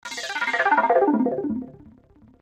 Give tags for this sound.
Korg
Minikorg-700s

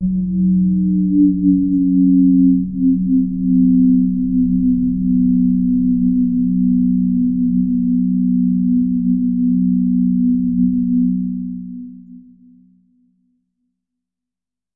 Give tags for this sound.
resonance
synth
waldorf
multi-sample
electronic

